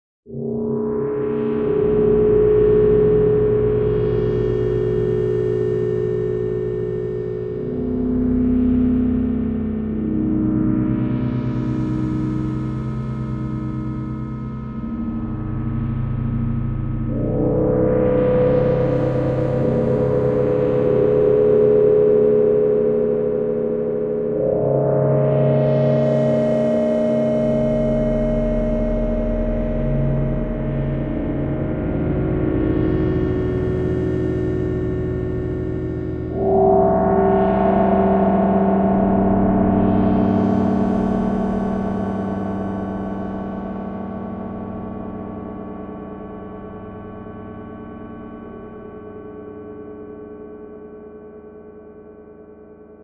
this sound is not a traditional drone. i made different tunes